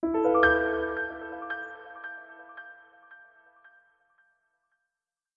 Short arpeggio open chord, part of Piano moods pack.